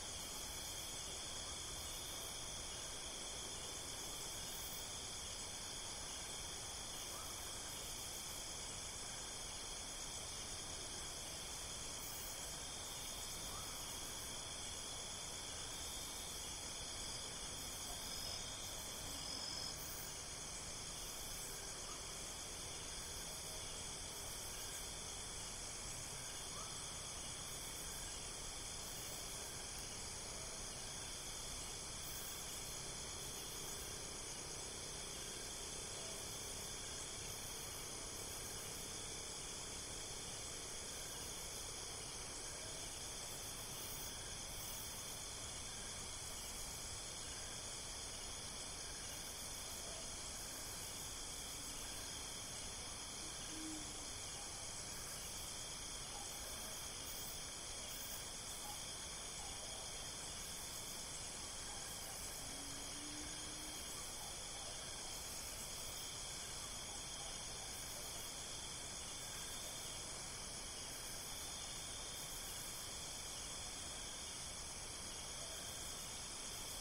Night Forest 2
This is recorded using Zoom H6 XY configured microphones with 120-degree directionality on both mics with no stand holding it, so there might have some noise from holding the microphone.
It was recorded in the middle of the night in a windy village area in the mountain called Janda Baik in Pahang, Malaysia.
This is the second capture of five.
ambience, atmosphere, evening, Malaysia, night, night-time